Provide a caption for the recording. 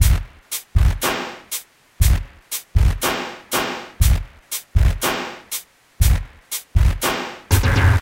NormGate+EQReverbFreqShift &mix
This time I went back to this sound:
a processed version of this sound:
by loading it in Paintshop pro and applying image processing (see original file for details).
Despite the fact my file was popular, I myself found the sound too abrasive and harsh to be musically useful. Here is another version where I mix the original sound with a processed version for a more useful result.
I used a gate with side input from the unprocessed sound to gate the harsh processed version before mixing.
The processed sound was frequency shifted down before mixing.
noisy, rhythm, sound-to-image, processing, loop, 120BPM, image-to-sound, paintshop-pro